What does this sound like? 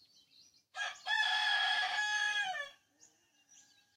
Rooster - single cry
One single rooster cry. He's about six months old.
Bird, Nature, Rooster